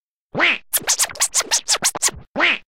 Scratch Quack 1 - 1 bar - 90 BPM (no swing)
Acid-sized sample of a scratch made by me with the mouse in 1999 or 2000. Baby scratch. Ready for drag'n'drop music production software.
I recommend you that, if you are going to use it in a track with a different BPM, you change the speed of this sample (like modifying the pitch in a turntable), not just the duration keeping the tone.
Software: AnalogX Scratch & Cool Edit Pro 2.1